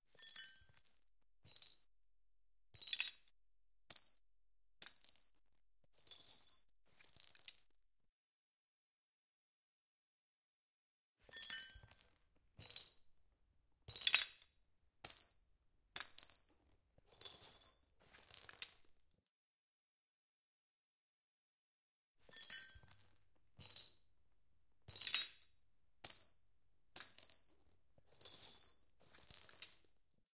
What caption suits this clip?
Handling Glass Shards 2
Handling tiny glass shards. Moving them. Some sound even harmonic.
Recorded with:
Zoom H4n on 90° XY Stereo setup
Octava MK-012 ORTF Stereo setup
The recordings are in this order.
broken, glass, glasses, handling, rubble, shards